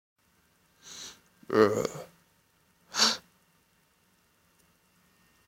Gestos de vampiro 3 y susto
Yo imitando un vampiro y un susto
cartoon, soundesign, foley, effect